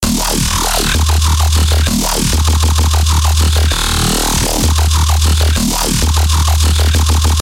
becop bass 5
Part of my becope track, small parts, unused parts, edited and unedited parts.
A bassline made in fl studio and serum.
a grinding talking low bassline, long sloping lines with short 1/6th popping basslines
grind, Xin, sub, fl-Studio, Djzin, dubstep, low, bass, electronic, loops, electro